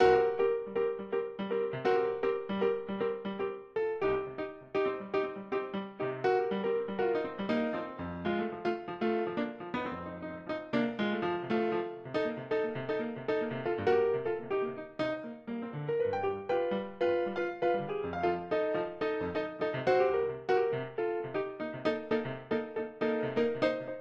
Song1 PIANO Do 4:4 120bpms
120, beat, blues, bpm, Chord, Do, HearHear, loop, Piano, rythm